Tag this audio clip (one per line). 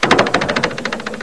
gourd
handmade
invented-instrument